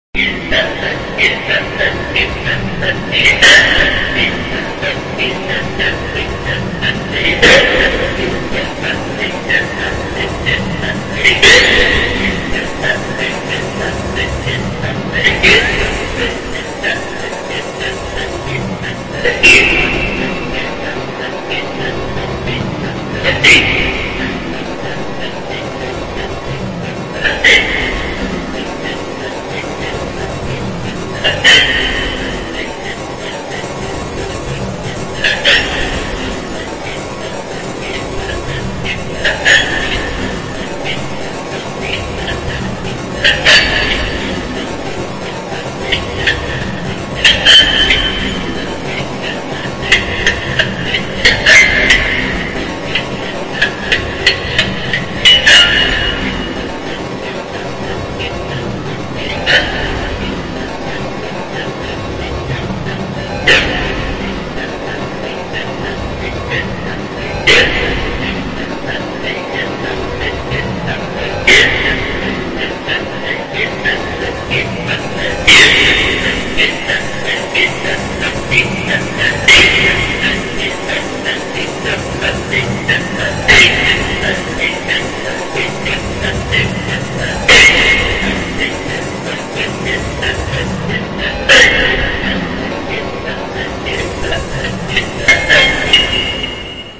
A vocal like cackling demonic chant that becomes more metallic and reverberated that is carried by a short melodic loop.
chant, sci-fi, horror, sound-design, frightful, hybrid-3, talk-box